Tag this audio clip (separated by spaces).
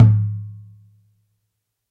African drums